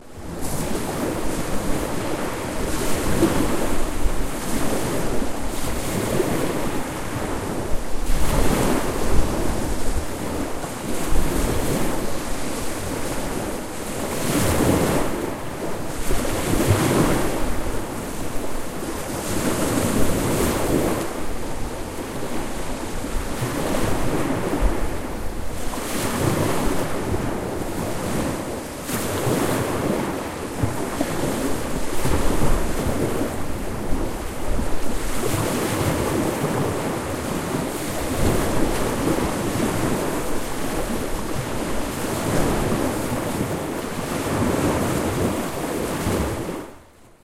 Puget Sound Waves
Recorded w/Tascam DR-40 at Sequalitchew, Puget Sound, Washington state. A windy day was causing some boisterous small waves.